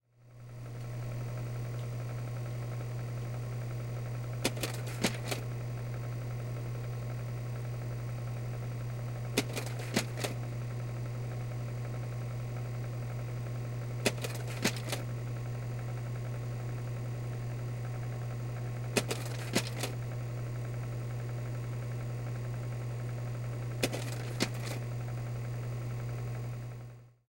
35mm SLIDE PROJECTOR
A stereo recording of a 35mm still camera slide projector. Stereo matched Oktava MC-012 cardoid capsules XY Array.